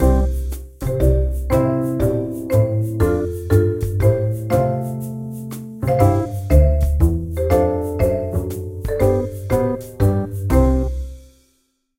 Winnies Interlude
music, friendly, double-bass, interlude, motif, short, fun, adventure, wurli, winnie, drum, marimba, kids, jingle, theme, easy, swing, shaker